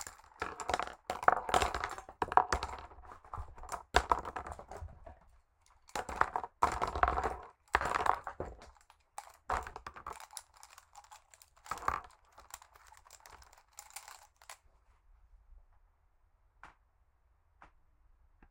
Throwing Pens
pens being thrown on table
pencil, throwing, fall, pen, pens, throw, falling